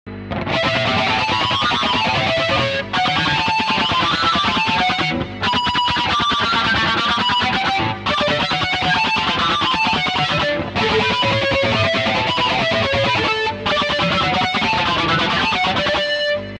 Harmonics Electric Guitar
This is used by System of a Down's co-leader Daron Malakian in the song Suite Pee. Making the harmonics sound on the guitar, just putting the finger close to the strings.
Guitar, Harmonics